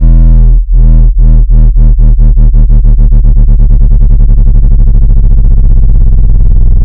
Sound effect or weird sub bass wobble down loop. 4 bars in length at 140 bpm
I used audacity to generate two tones both 41.2 or E (e1). On one I applied a sliding pitch shift. For some reason this creates a nice wobble.
I adjusted the gain to +6 decibal in this particular sample, with these low frequencies this makes the sine wave sound more like a tone, rather than the rumble or low throb you get at 0.